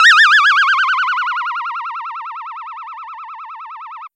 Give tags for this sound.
frequency-modulation; LFO; FM; Reason; audio-rate; FX; oscillator

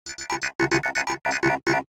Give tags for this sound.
loop; computer; digital; sound-effect; noise

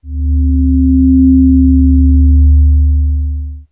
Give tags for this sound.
additive; metallic; multisample

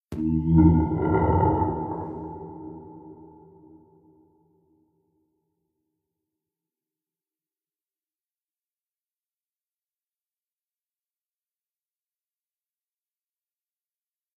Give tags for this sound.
creature; creepy; noises

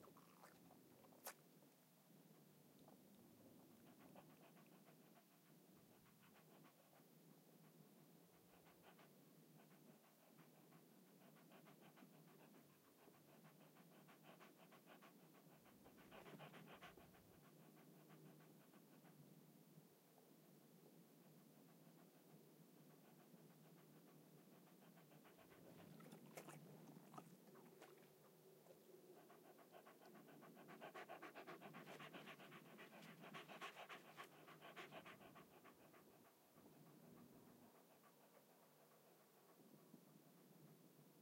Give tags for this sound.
small-dog; small-dog-panting; dog-panting; AudioDramaHub; dog; field-recording

